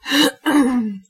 throat clear
clearing of the throat
clear, grunt, throat